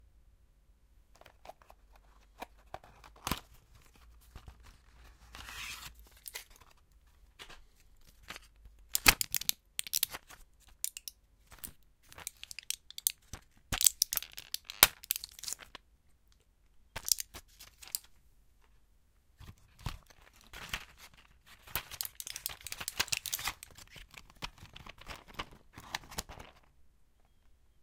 blisterTabletsSeizure Grammidin
Seizure tablets from the blister.
Plastic blister with metal underlay.
Tablet diameter aprox. 15mm.
AB-stereo
metal-underlay, tablet